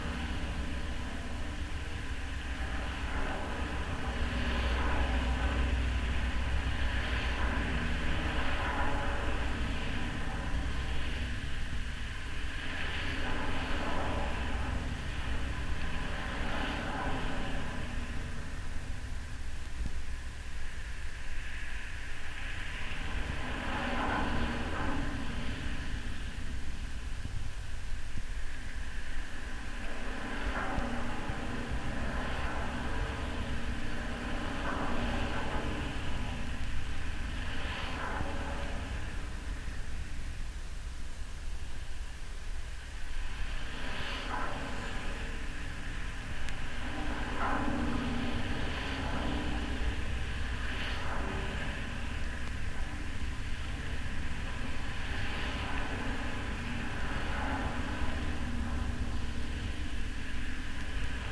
Contact mic recording of the Golden Gate Bridge in San Francisco, CA, USA at southeast suspender cluster #44. Recorded December 18, 2008 using a Sony PCM-D50 recorder with hand-held Fishman V100 piezo pickup and violin bridge.